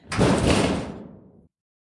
small-metal-hit-04
Metal rumbles, hits, and scraping sounds. Original sound was a shed door - all pieces of this pack were extracted from sound 264889 by EpicWizard.
industry, pipe, lock, percussion, steel, hammer, rod, impact, shiny, iron, metal, bell, ting, industrial, shield, blacksmith, clang, hit, metallic, nails, scrape, factory, rumble